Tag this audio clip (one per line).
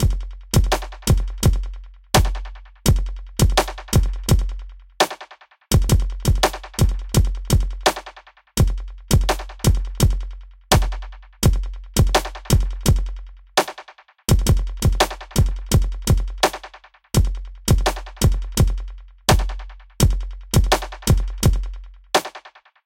beat
beats
drum-loop
drums
hip
hiphop
hop
loop
loops
rap